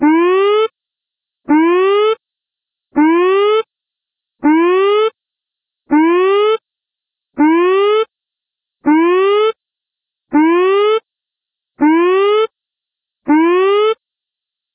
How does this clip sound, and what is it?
Sweeping alarm sound. Taken from this recording
I processed it in audacity with sliding time scale/pitch shift tool.
alert, hyderpotter, electronic, loop, sweeping, engaged, 80356, sweep, bleep, bt, remix, alarm